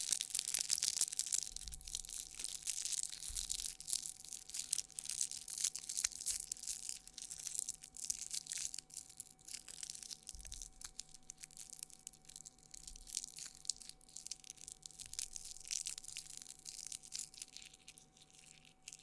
A quick recording of a strange crackling sound I recorded earlier.